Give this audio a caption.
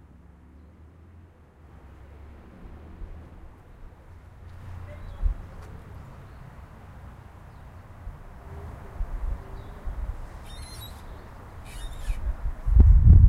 session 3 LBFR Mardoché & Melvin [2]
Here are the recordings after a hunting sounds made in all the school. Trying to find the source of the sound, the place where it was recorded...
france, labinquenais, rennes, sonicsnaps